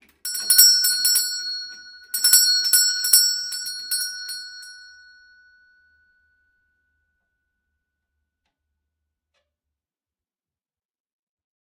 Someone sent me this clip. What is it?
Doorbell Pull with pull Store Bell 06
Old fashioned doorbell pulled with lever, recorded in old house from 1890
Doorbell, Pull, Store